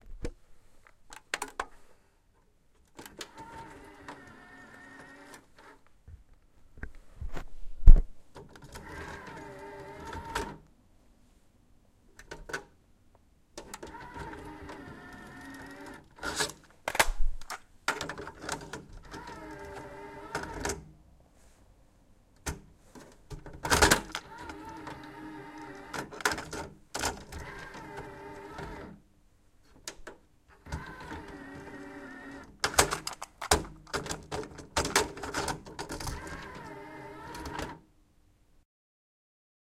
cassette being loaded into deck 1
Cassette being loaded into cassette deck.
casette-tape, noise, tape